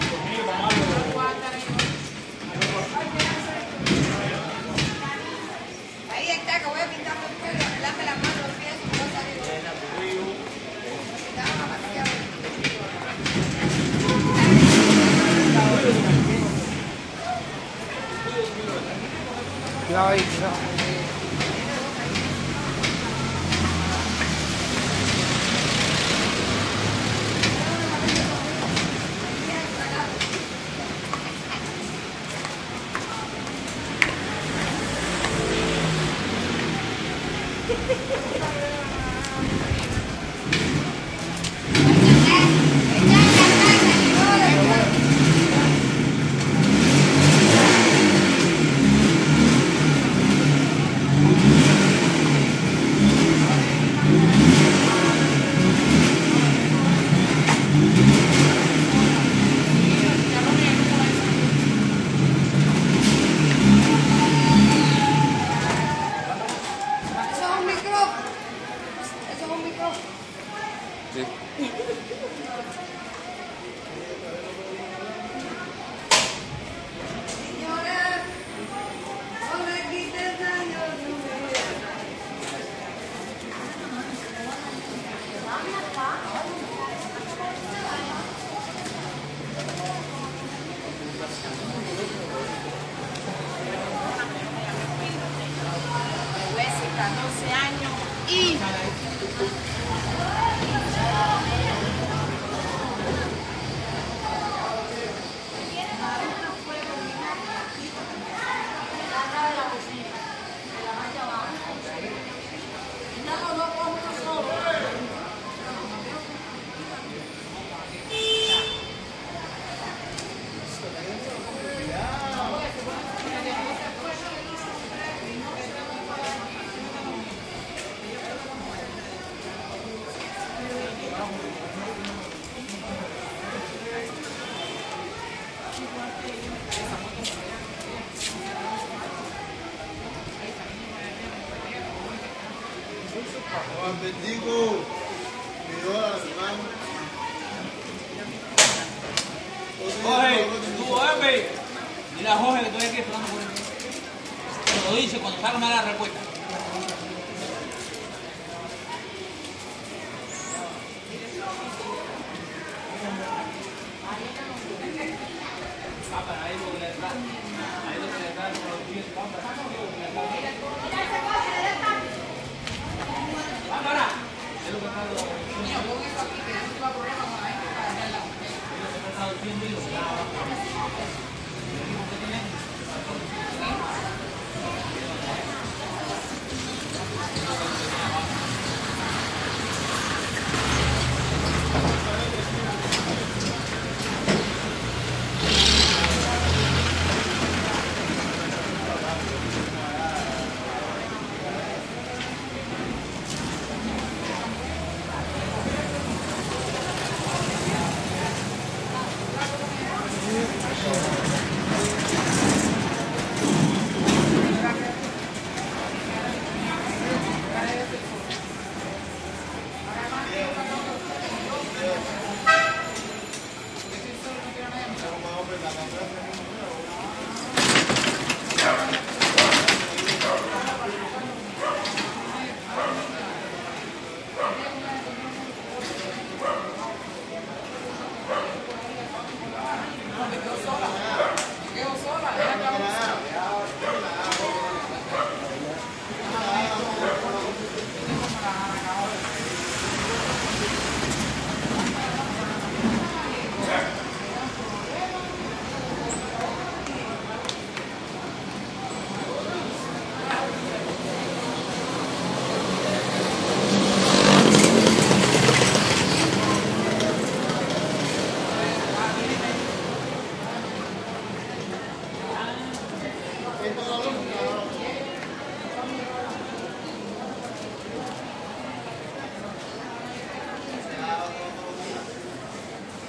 street old havana cuba3 motor
street in old havana- pretty active with people, carts. this one with someone fixing a motorcycle and revving the motor a few times.
motor, people, street, old, cuba, havana, cars